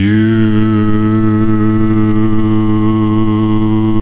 Male voice singing the vowel "U" at A1, 110Hz.